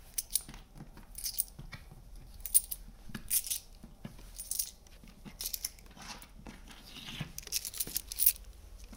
picking up coins
picking up seven quarters from off of a wooden floor.
coins, currency